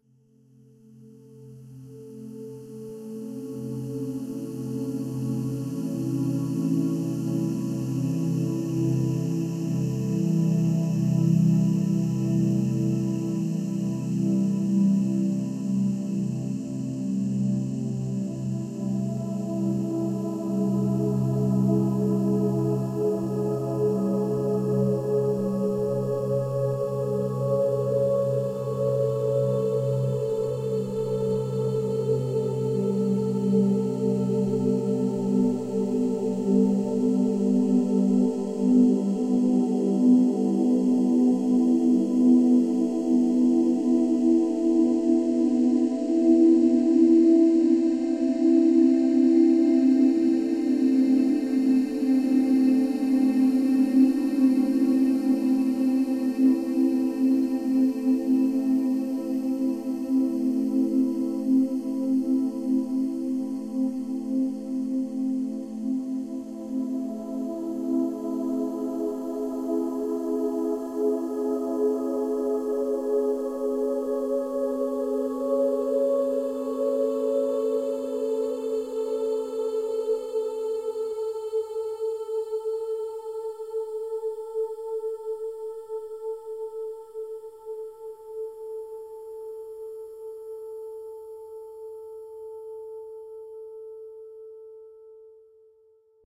An ethereal sound made by processing female singing. Recording chain - Rode NT1-A (mic) - Sound Devices MixPre (preamp)
choir, vocal, synthetic-atmospheres, floating, female, ethereal, blurred, atmospheric, emotion, experimental, voice